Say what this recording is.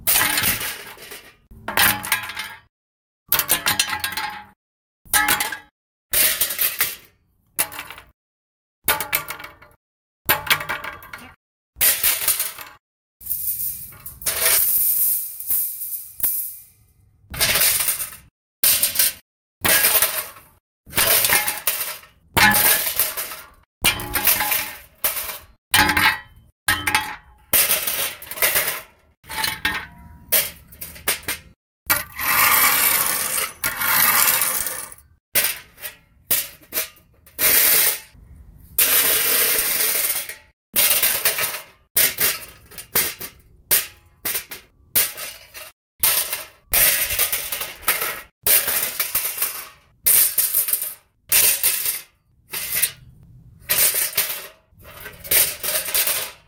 Bike Crash MEDLEY
Made for a bike crash by dropping various metal tools on asphalt
bike, iron, dropped, percussion, ting, clang, impact, crash, mechanical, metal, metallic, bicycle, accident, dropping